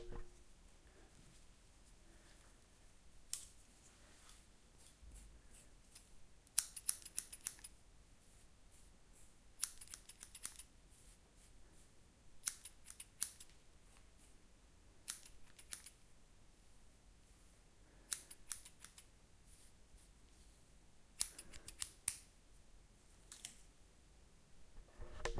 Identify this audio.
Close sound of cutting fringe, including comb and light breathing.